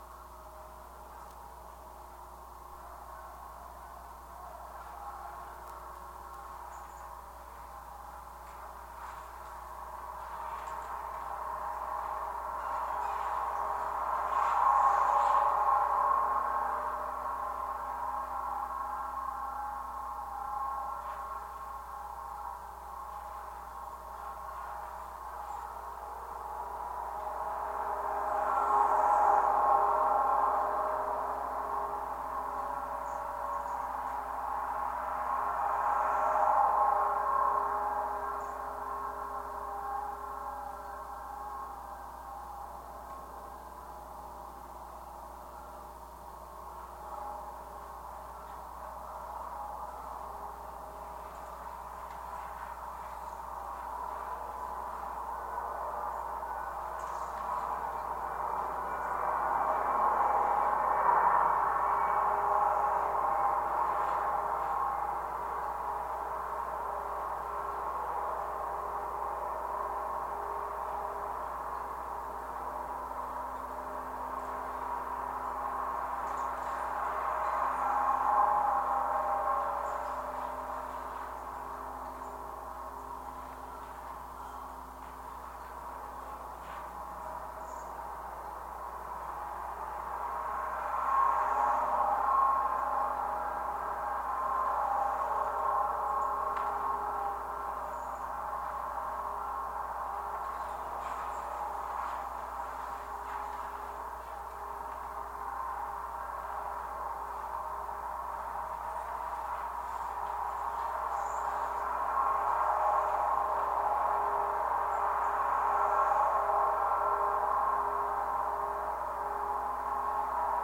SC Agnews 11 power pylon
Contact mic recording of a power pylon on Lafayette Street in Santa Clara, California, north of Fairway Glen Drive. Recorded July 29, 2012 using a Sony PCM-D50 recorder with a wired Schertler DYN-E-SET contact mic. Traffic noise, resonance, leaves scratching the surface of the pole in the wind.